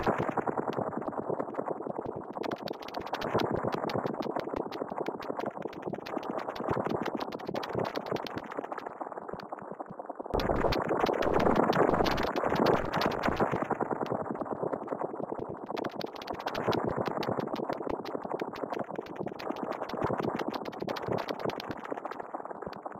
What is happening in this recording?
One in a small series of odd sounds created with some glitch effects and delays and filters. Once upon a time these were the sounds of a Rhodes but sadly those tones didn't make it. Some have some rhythmic elements and all should loop seamlessly.